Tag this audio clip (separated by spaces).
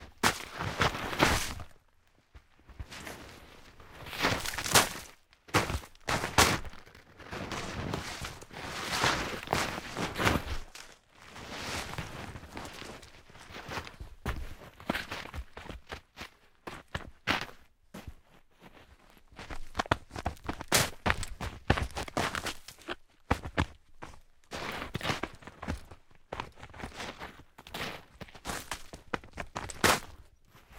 boots deep footsteps forest heavy rubber snow